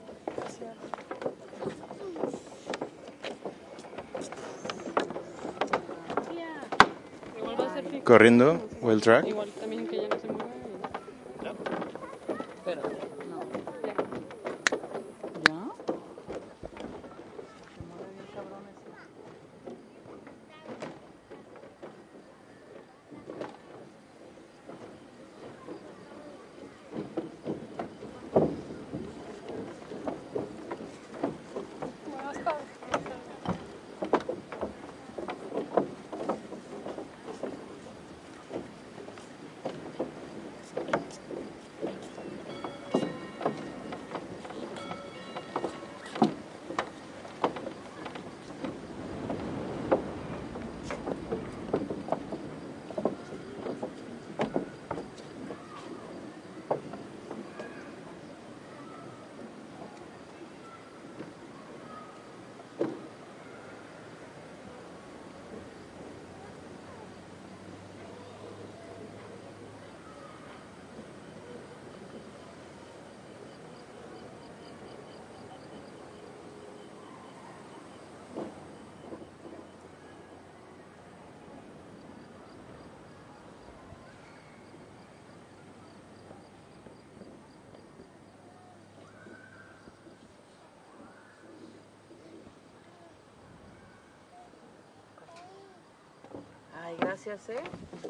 wildtrack:bolaños:park:towntown:bridge:crickets
REcorded with ZOOM f4 + At 385b, in a town of Jalisco, Mexico.
ambience is for a proyect call Music Hunters.
bola, night, crickets, field-recording, os, insects, ambience